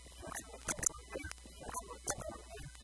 vtech circuit bend042
Produce by overdriving, short circuiting, bending and just messing up a v-tech speak and spell typed unit. Very fun easy to mangle with some really interesting results.
broken-toy, circuit-bending, digital, micro, music, noise, speak-and-spell